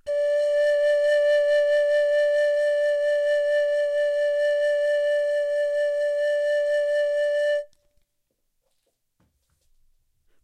long tone vibrato pan pipe D2
d2, pan